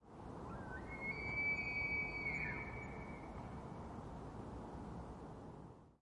Recording of an elk in Banff, Alberta, during mating season. The cry is very distinctive and somewhat frightening. Recorded on an H2N zoom recorder, M/S raw setting.